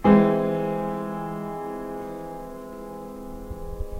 Me and a friend were allowed access into our towns local church to record their wonderful out of tune piano.